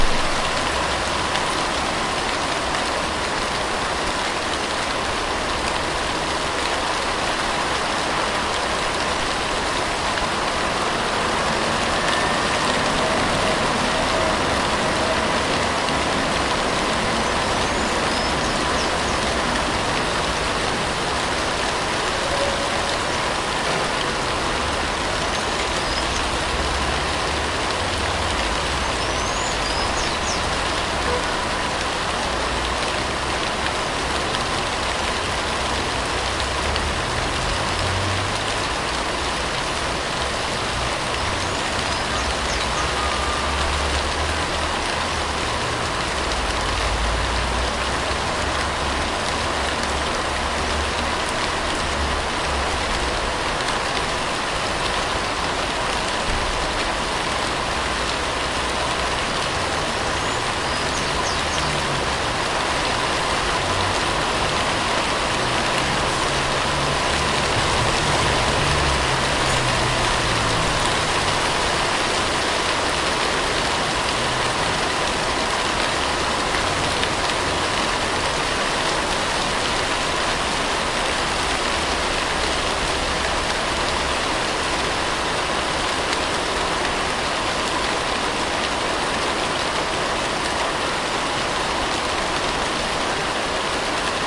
Rain with bird and distant noise ambient
Rain with bird and distant noise. Rainwater is falling on the leaves of a tree with birds. Distant noise from truck and car.
Chuva com passaro e ruído distante. A água da chuva está caindo nas folhas de uma árvore com passarinhos. Ruído distante de caminhão e carro.